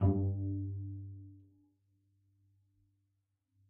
One-shot from Versilian Studios Chamber Orchestra 2: Community Edition sampling project.
Instrument family: Strings
Instrument: Cello Section
Articulation: tight pizzicato
Note: F#2
Midi note: 43
Midi velocity (center): 95
Microphone: 2x Rode NT1-A spaced pair, 1 Royer R-101.
Performer: Cristobal Cruz-Garcia, Addy Harris, Parker Ousley
cello, cello-section, fsharp2, midi-note-43, midi-velocity-95, multisample, single-note, strings, tight-pizzicato, vsco-2